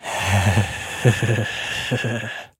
Creepy laughter
Nasty male laugh
Recorded with Zoom H4n
creepy, laugh, pedofile